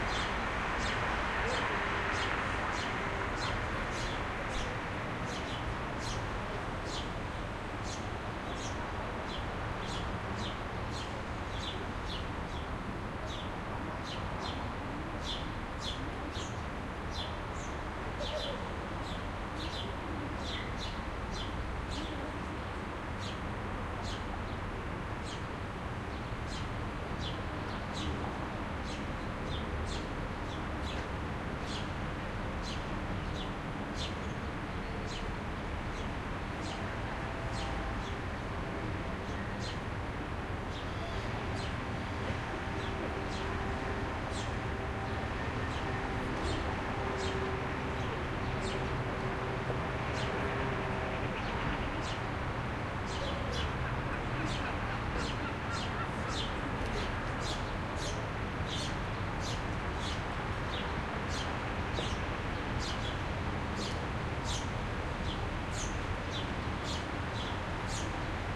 city square calm distant traffic birds Marseille, France MS
birds, calm, city, distant, France, Marseille, MS, square, traffic